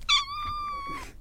Cat meow once
a very adorable high-pitched cat meow taken for fun
meow, high-pitched, cat